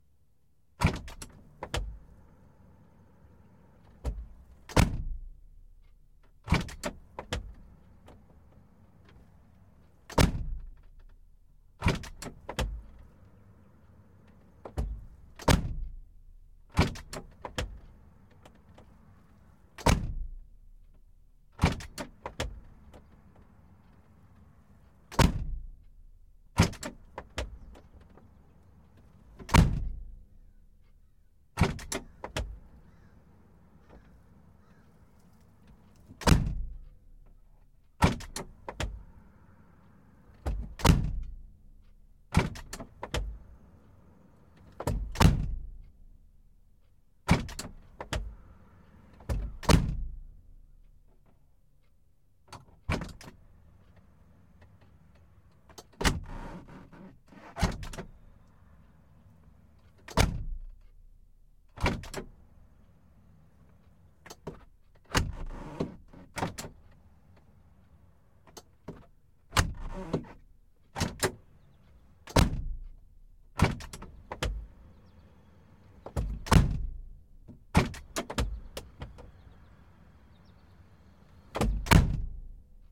Clip featuring a Mercedes-Benz 190E-16V driver's door being opened and closed. Recorded with a Rode NT1a in the passenger seat, where a listener's head would be.
benz, dyno, door, dynamometer, vehicle, slam, vroom, car, engine, mercedes